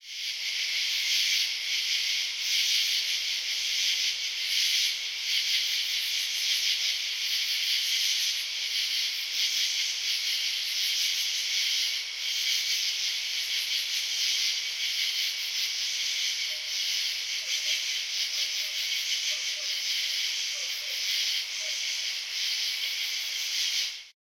Crickets dogbark
Crickets recorded on an August night in Mount Sinai, N.Y. closer to dense wooded area, dog barking in the distance.
nature, field-recording, summer, insects